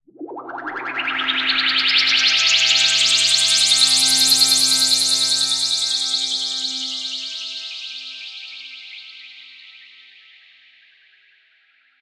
This was made with FL Studio for a project that was never completed. They wanted an absurd sound for when a magic rat teleported away.